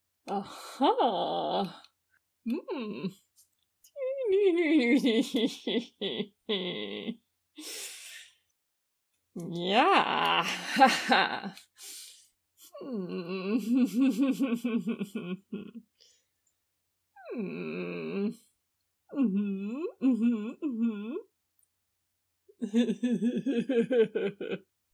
voice of user AS015537